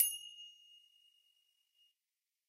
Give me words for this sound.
This pack contains sound samples of finger cymbals. Included are hits and chokes when crashed together as well as when hit together from the edges. There are also some effects.